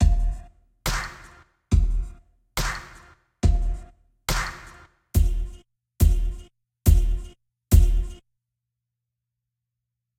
HH140 Intro Beat
A beat used for the intro of a track I made; at 140 BPM.